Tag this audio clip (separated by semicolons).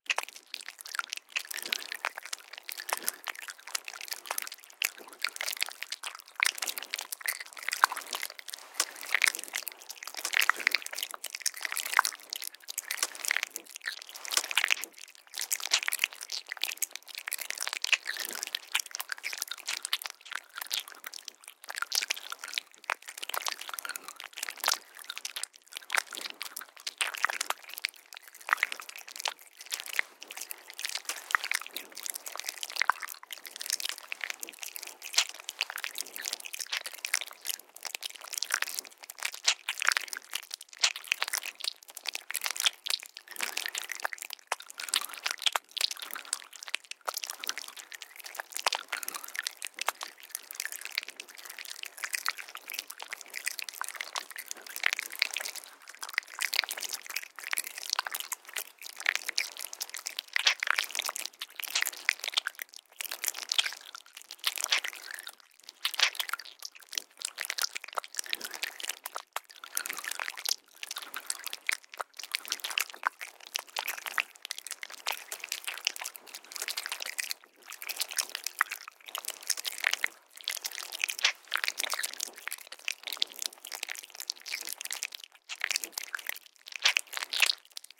cannibal; crush; eating; haunted; creepy; flesh; food; zombie; eat; nasty; bite; horror; terror; sounddesign; meat; scary; chewing; monster; chew; dead; beast; creature; prey